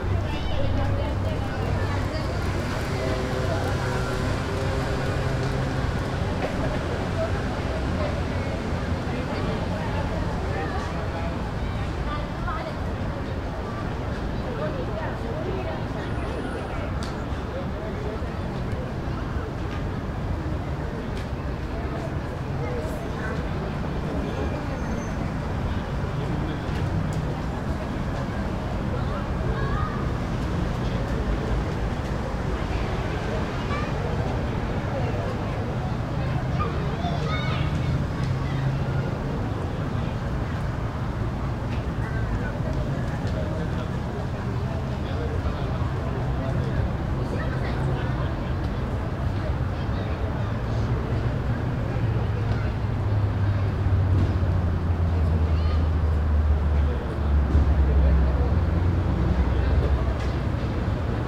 outdoors street ambient traffic people jabbering and distant kids
kids, people, street